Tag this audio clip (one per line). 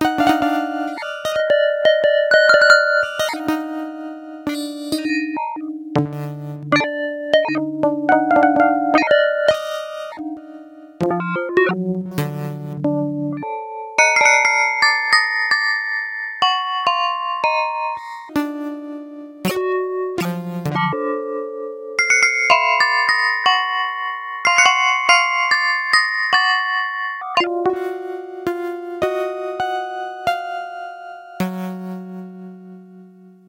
Keyboard
FM-synthesizer